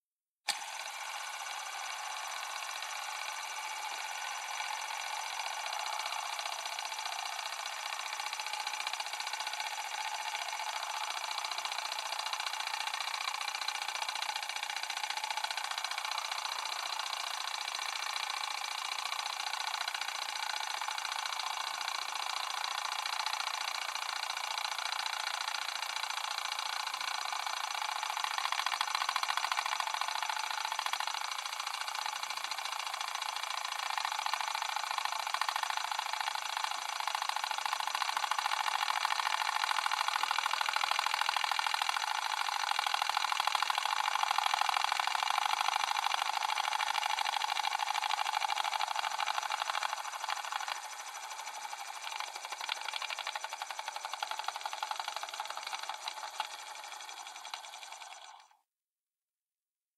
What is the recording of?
DeJur Electra - 8mm Movie Camera - 03
Film rolling through 8mm Movie Camera
Recorded on Tascam DR-40
8mm, Camera, Cinematic, Film, Free, Movie